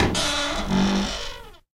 Grince Arm Chante
a cupboard creaking